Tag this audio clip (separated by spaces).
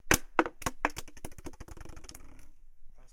impact ground falling plastic bottle